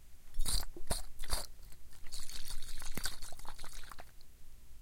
Shaking bottle of vinegar
Shaking a glass bottle of vinegar. Recorded using a Roland Edirol at the recording studio in CCRMA at Stanford University.
glass, vinegar, bottle, liquid, shake, aip09